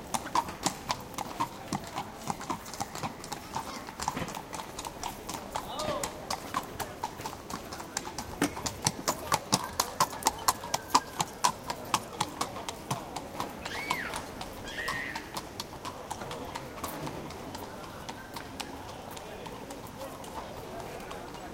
horse walking on paving stones, some voices in background. Recorded with Edirol R09, internal mics
city, cobble, field-recording, horse-cart